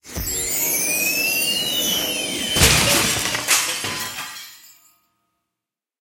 Magical Elf Entrance
A sound design used for a theme park's Holiday show where an elf suddenly appears and flies into a small room full of objects.
christmas, holiday, debris, bang, rocket, elf, xmas, fly, boom, magic, crash